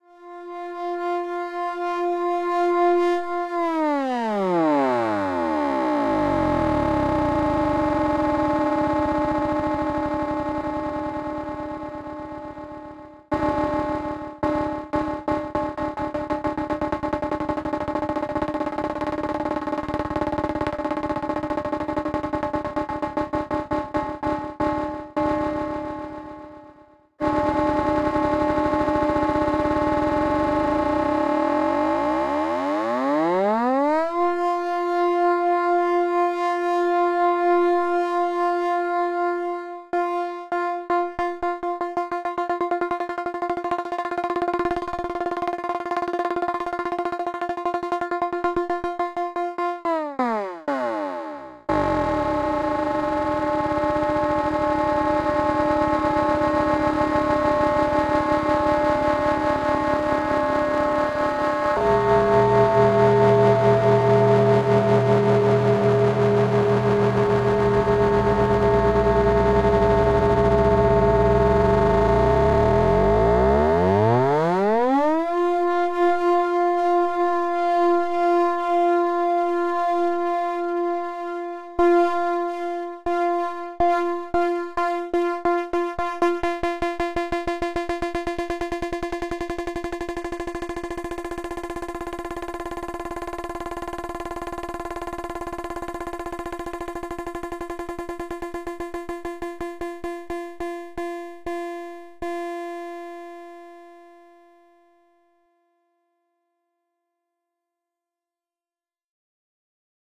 FX Phased Grain 001
experimental, grain, modulation, Synthesis